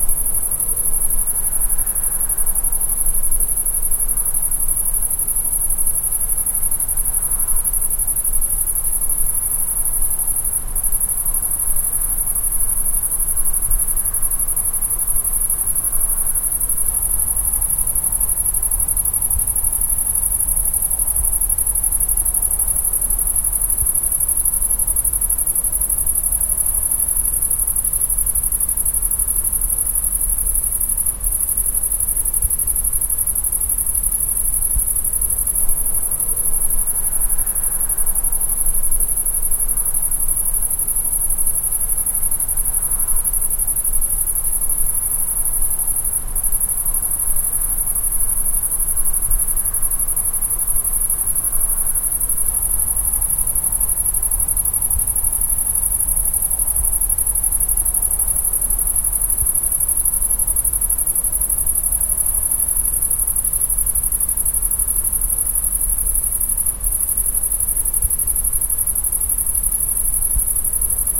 Night time crickets
Field recording of crickets during night time.
Recorded with Zoom H1
night, nature, cricket, field-recording, insects, night-time, crickets